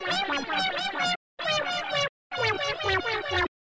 Synth Loop - Itchy & Skratchy
Snippet of a minibrute synth experiment. Loops at approx 129.7 bpm